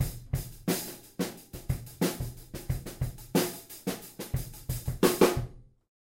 supra beat straight hiphop
A few bars of drums, hip hop style, with snare and semi-open hihat. Ludwig Supraphonic used.
Ludwig, Supraphonic, beat, drum, hip, hop